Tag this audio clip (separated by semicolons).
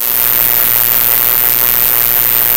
sparkle; eletric; electric-arc; electronic; buzz; sparks; loop